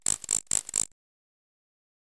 insects noise 002
A short electronic noise loosely based on insects.
ambience, ambient, chirp, electronic, evening, field, insect, morning, noise, pond, synthetic, water